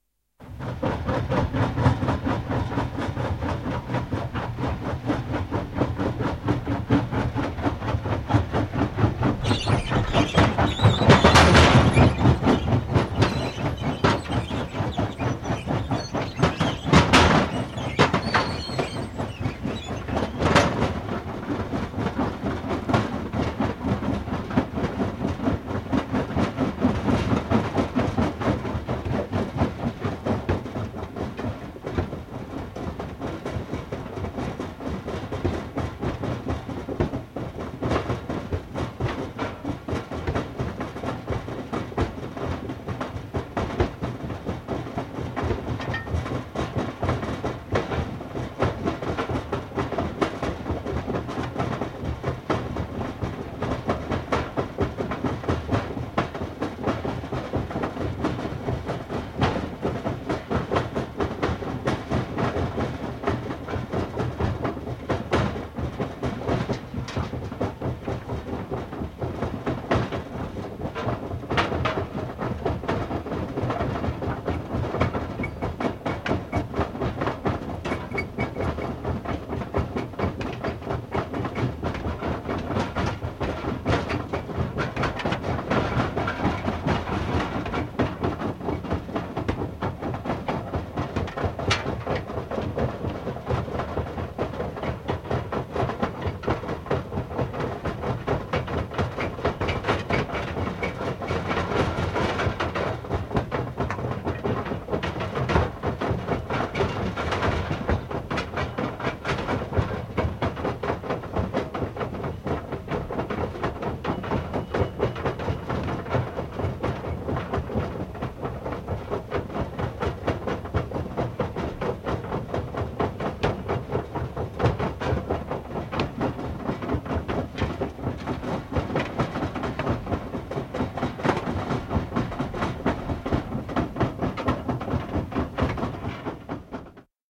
Kivimurskaamo, kivilouhos / Quarry, crushing plant, crusher, breaker, big jaws crushing, pounding rocks
Murskaimen isot leuat lonksottavat ja murskaavat kiviä.
Paikka/Place: Suomi / Finland
Aika/Date: 24.05.1967
Tehosteet, Field-Recording, Pound, Soundfx, Crusher, Suomi, Yleisradio, Murskata, Stones, Crush, Jaws, Leuat, Kivet, Kivi, Yle, Crushing-plant, Stone, Kivimurskaamo, Finnish-Broadcasting-Company, Finland, Breaker, Rocks, Rock, Murskain, Lonksottaa, Jauhaa, Kivilouhos, Quarry